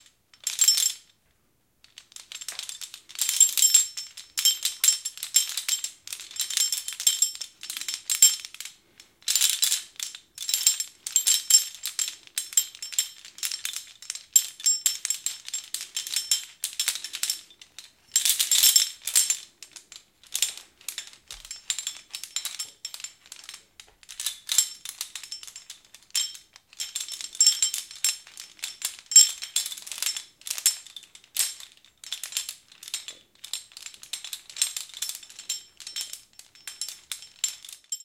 A stereo recording of a bunch of aluminium climbing gear jingling. Rode NT-4 > FEL battery pre-amp > Zoom H2 line in.